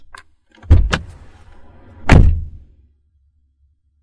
This is the sound of a car door opening and closing. Used a Zoom H4n Recorder to record the sound.